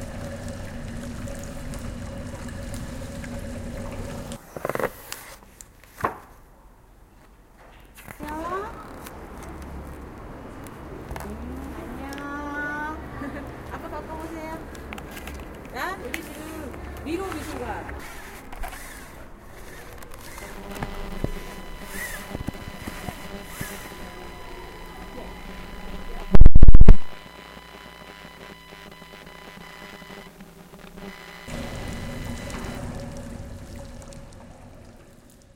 Caçadors de Sons Joan dAustria 03
Soundtrack from the workshop "Caçadors de sons" by the students from Joan d'Àustria school.
Composició del alumnes de 3er de l'ESO del Institut Joan d'Àustria, per el taller Caçadors de sons.